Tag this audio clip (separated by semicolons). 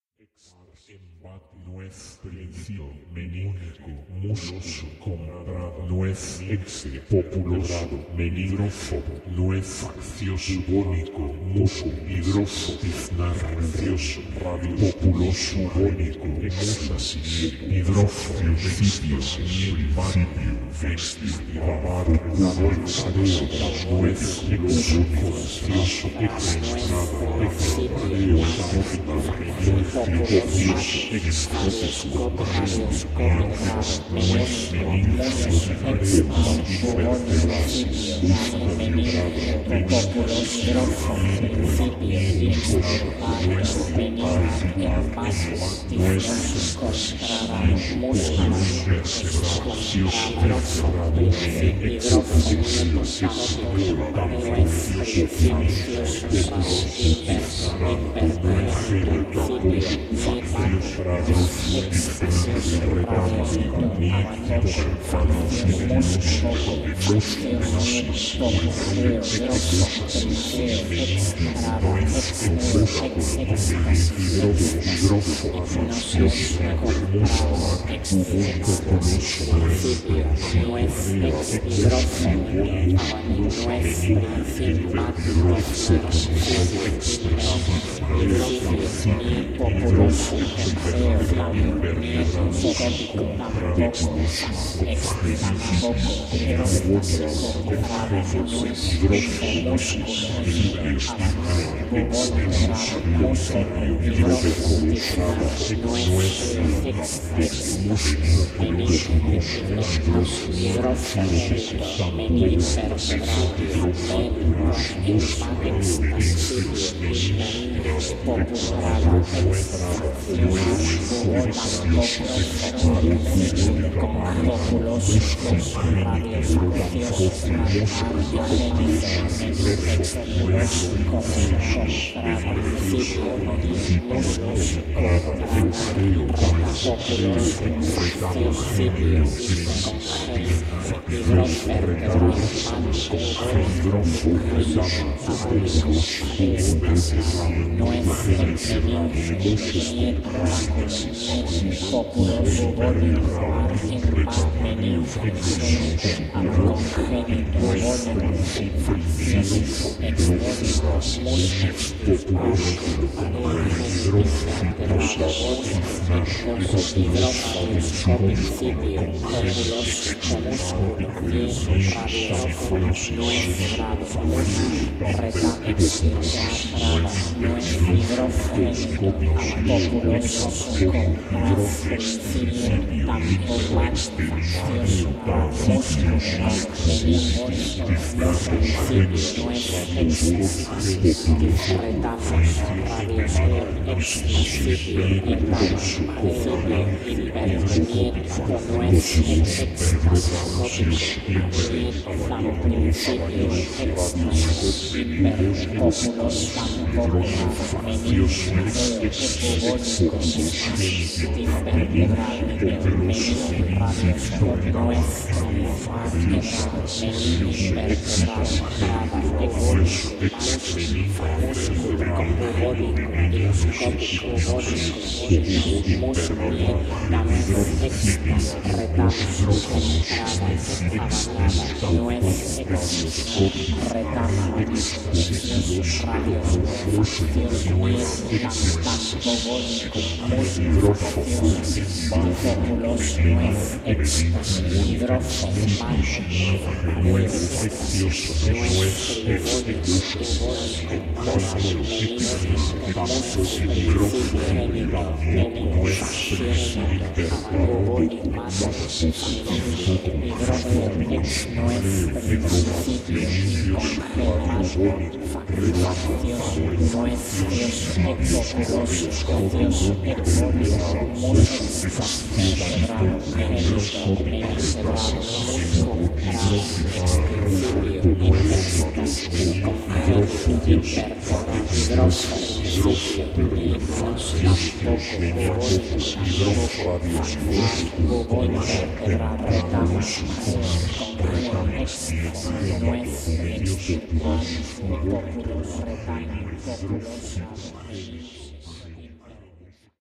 head; doppler; voices; psychedelic